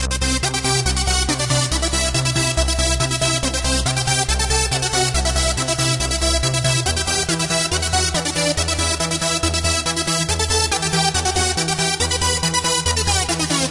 Sequence and bassline.